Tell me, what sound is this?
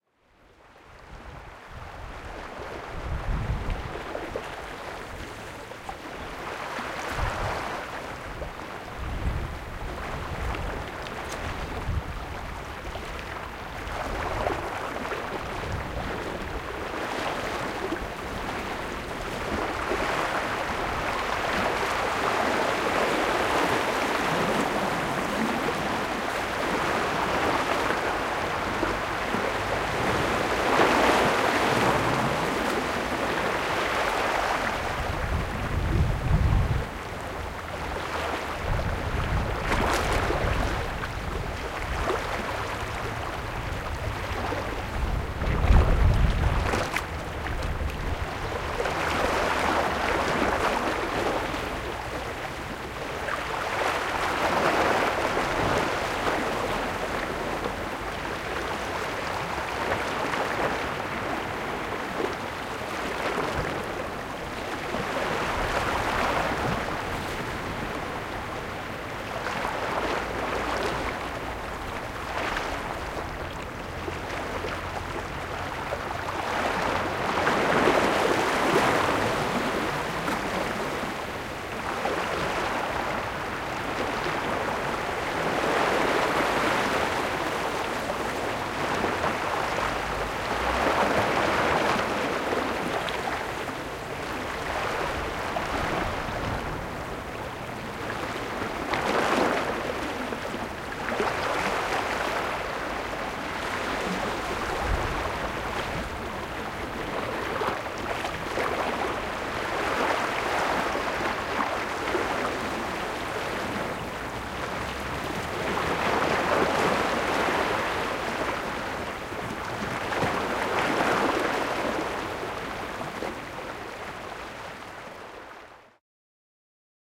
Water in Qawra, Malta
Recording of waves getting into cracks of a rocky shore at the the Mediterranean Sea, taken in Qawra, Malta (26.11.2018).
sea, seaside, shore, rocks, malta, waves, water